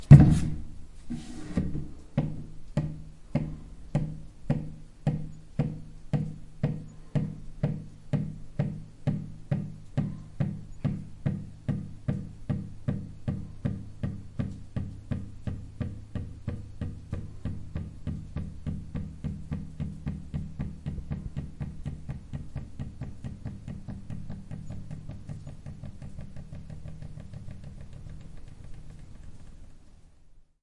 swinging lid of a bin
lid, swinging, rubbish, bin, can, beat